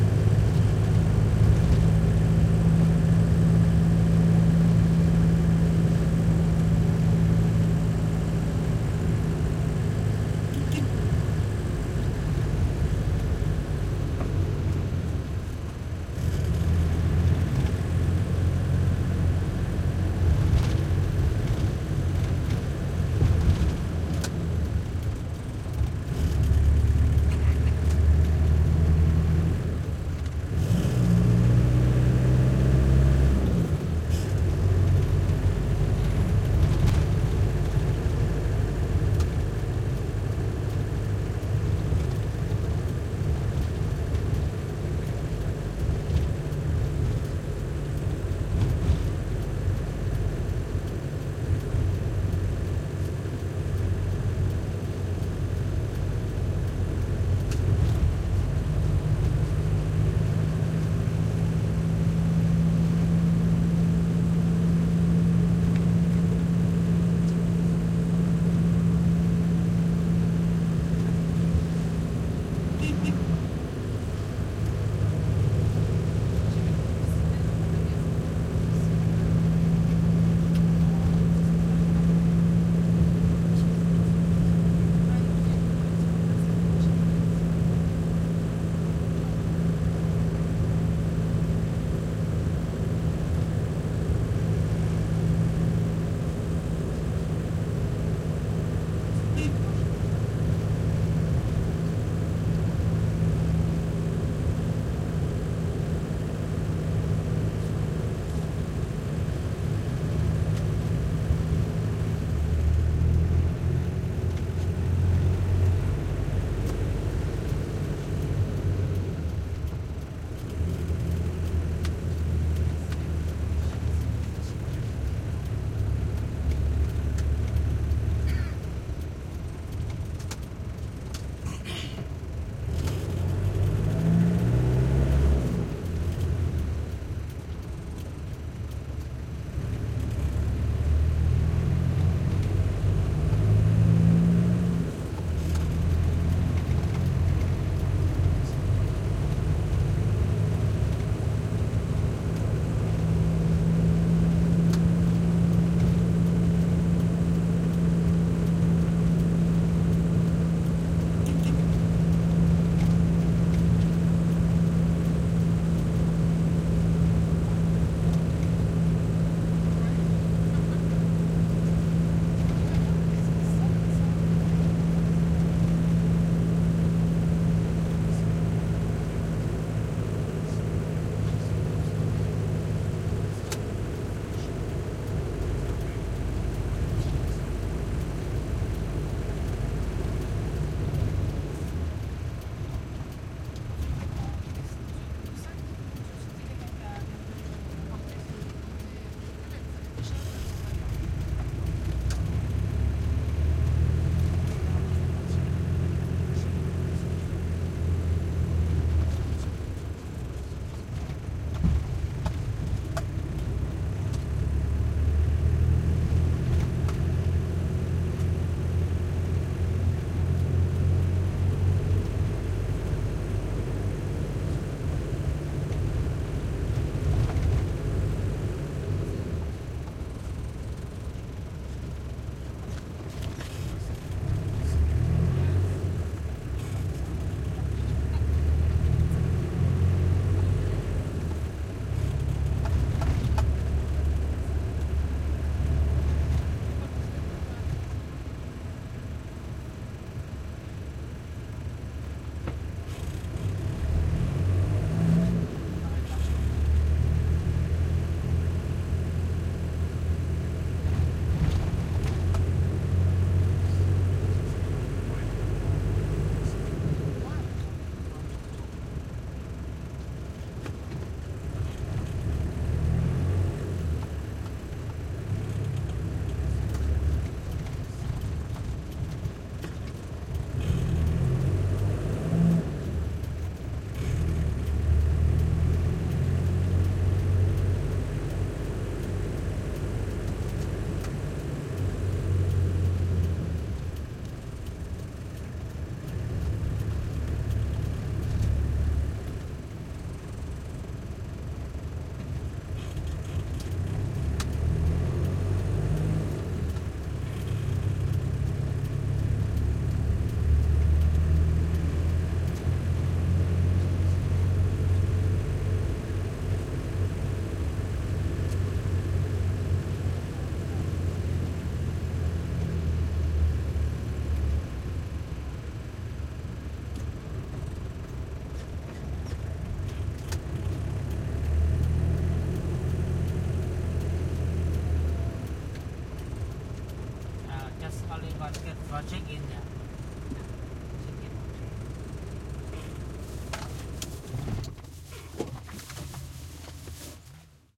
Thailand truck minibus int throaty driving real bumpy various + slow to stop and shut off, and indistinct bg voices